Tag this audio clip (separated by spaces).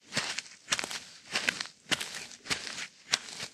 floor footsteps ground steps walking walks